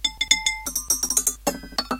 It's night ad a little intruder touches plates and cups when jumping on the table. The name of the mouse is Tom.